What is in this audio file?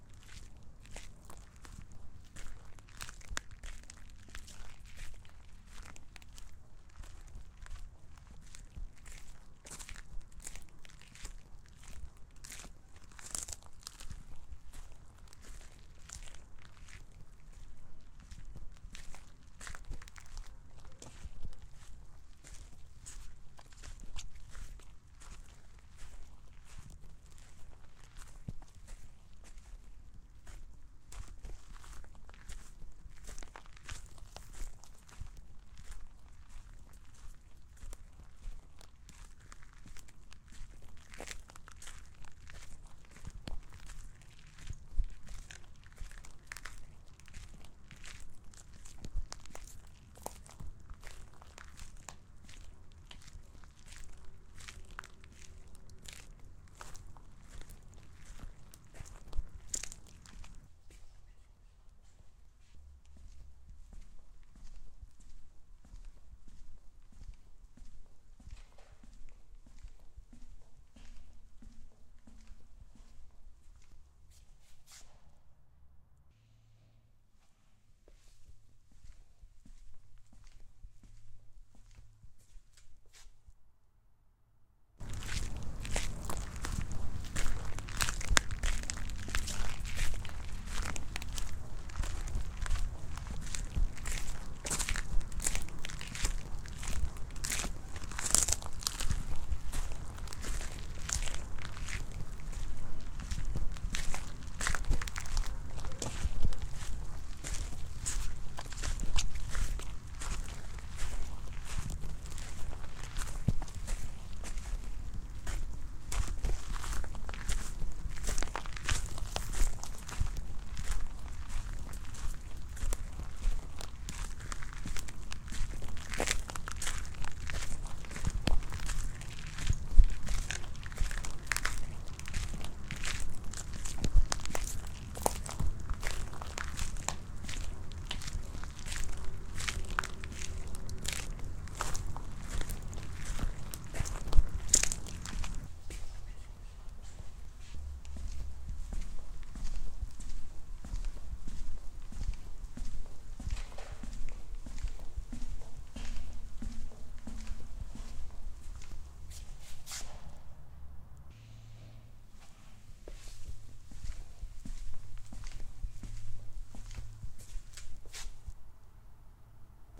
Footsteps sand and marble
Footsteps in ground exterior and marble in interior.
footsteps, walking, walk, crunch, steps, gravel, footstep, step, interior, pasos, foley, grava, arena, tierra, sand, feet, marmol, foot